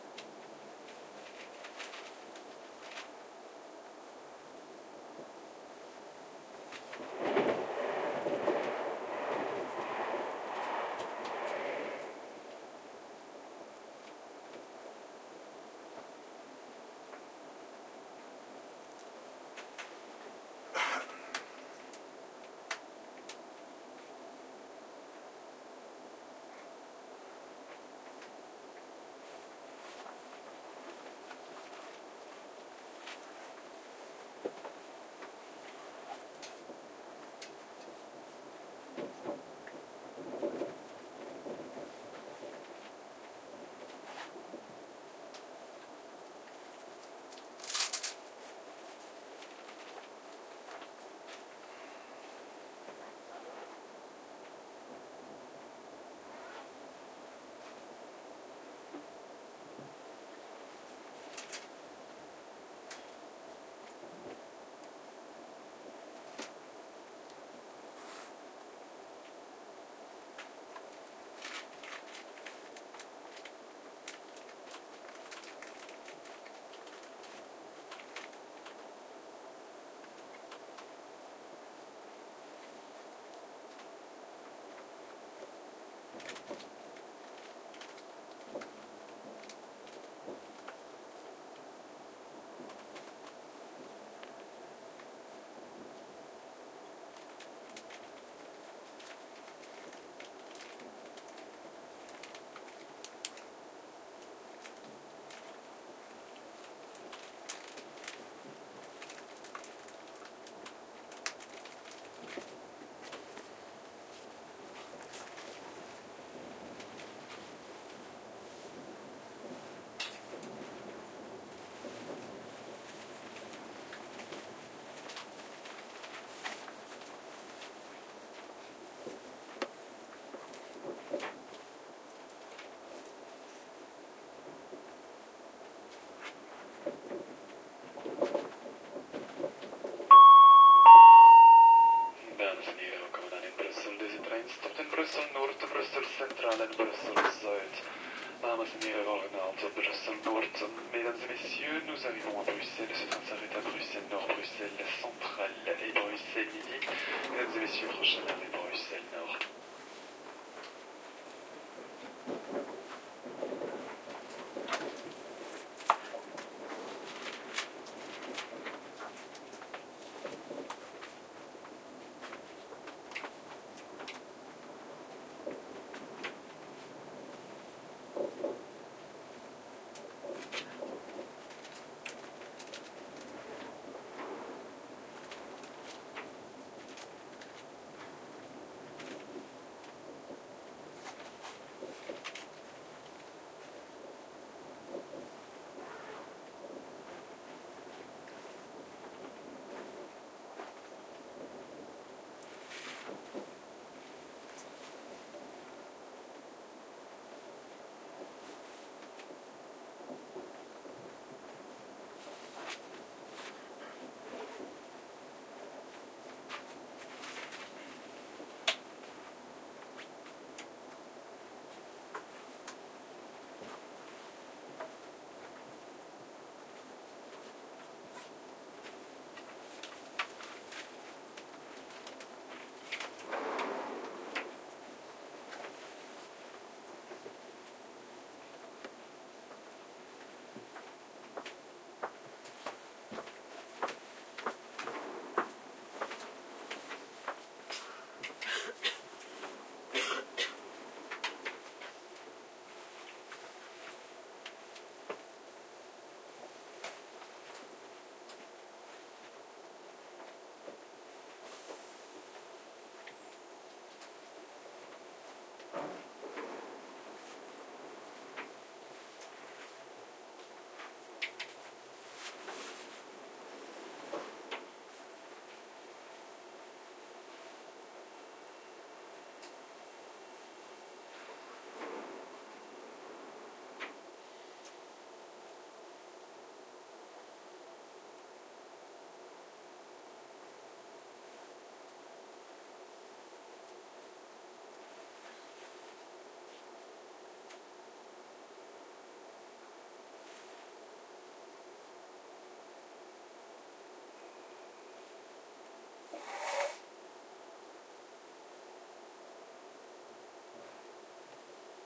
amb train bxl
ambient sound inside a train arriving at brussels north station.
recorded on tascam dr-08.